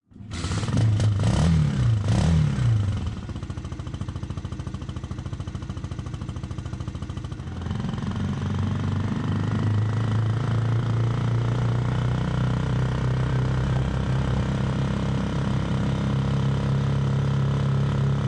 Motorbike Start
motor, engine, start, IGNITION